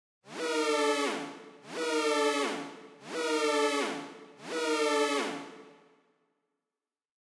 A loopable Sci-Fi alarm sound made with synth.